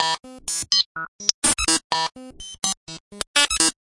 ElektroBuzz 125bpm04 LoopCache AbstractPercussion
Abstract Percussion Loop made from field recorded found sounds